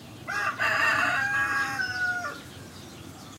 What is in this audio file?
kikiriki = cocorico = cock-a-doodle-do etc